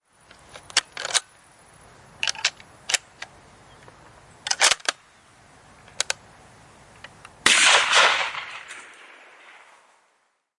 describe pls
Loading and firing off a riffle
Field-recording of rifle shot with loading. Stereo.
Using a Zoom H5 device and Audition to do some editing and cleaning.
Recorded in Sept. 2015.
military, rifle, firing, army, fire, shooting, attack, war, warfare, bullet, projectile, weapon, loading, hunt, gun, shot